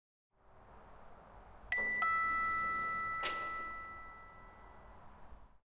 Elevator ding dong
Always open for feedback, always trying to learn.